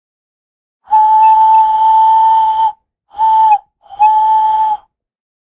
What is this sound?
Human Human Train Whistle vers.2
A train whistle sound I made by blowing through my hand a certain way. The 101 Sound FX Collection.
choo, choochoo, human, train, whistle